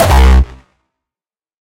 Hardstyle Kick C3
a Kick I made like a year ago. It has been used in various tracks by various people.
909, access, c, dong, drumazon, hardstyle, harhamedia, kick, raw, rawstyle, roland, sylenth1, tr-909, virus